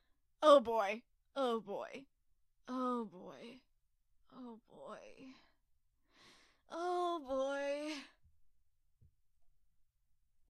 oh boy
attack, hurt, beat, whip, fight, battle